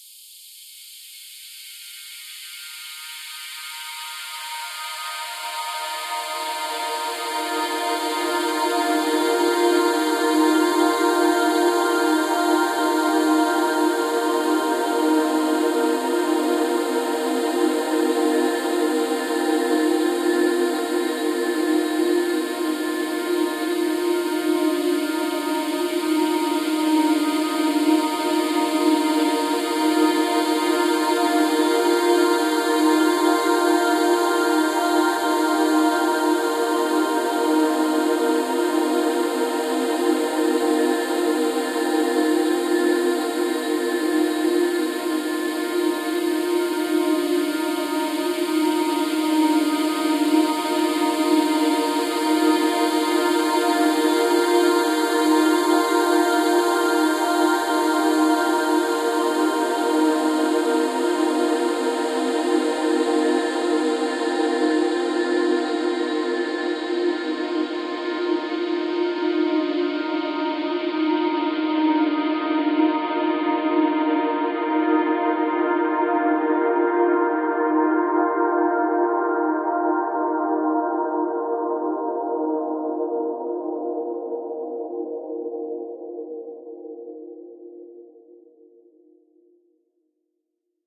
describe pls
More blurred atmospheric sounds from female vocal recordings.
choir, vocal, atmospheric, ambience, ambiance, synthetic-atmospheres, floating, emotion, ethereal